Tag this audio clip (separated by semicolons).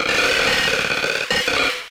electric; electronic; freaky; future; fx; glitch; lo-fi; loop; machine; noise; sci-fi; sfx; sound; sound-design; sounddesign; strange